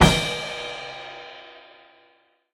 This Hit was recorded by myself with my mobilephone in New York.